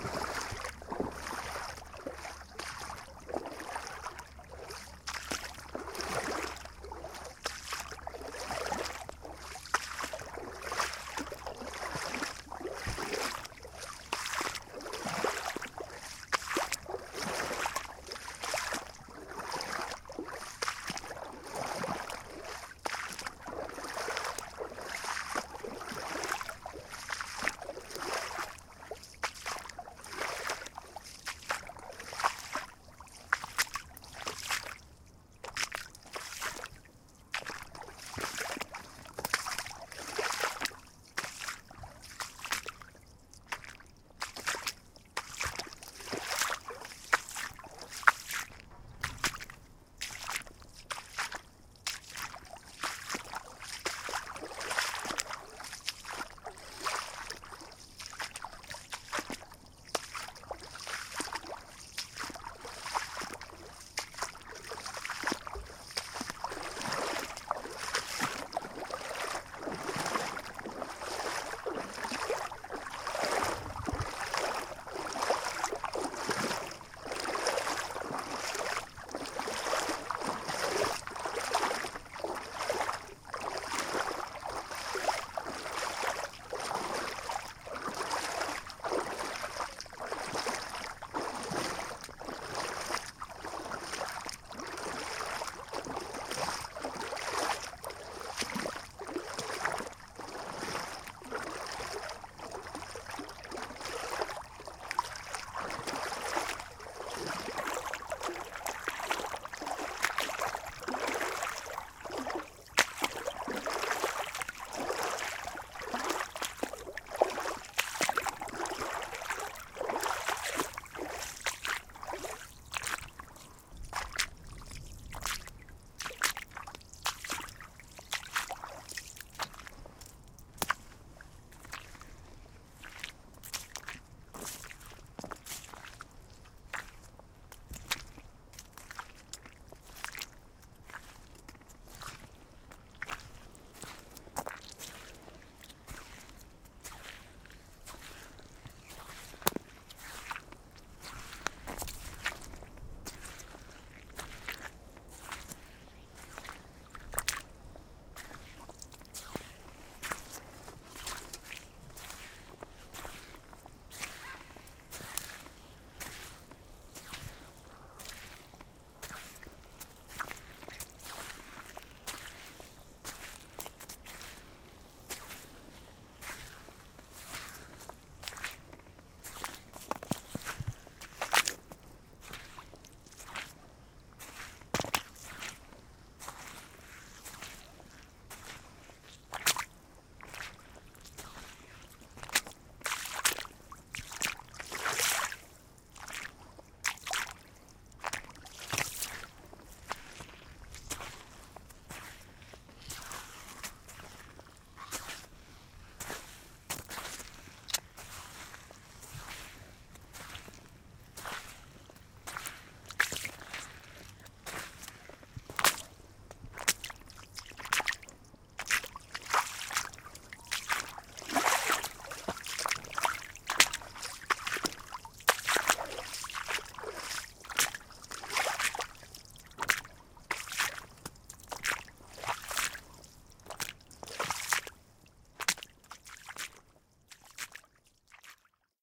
footsteps with rubber boots in deep water, then in small water, then on sand (2,10). Close up.
France, 2005
recorded with schoeps cmc6-mk4
recorded on fotex fr2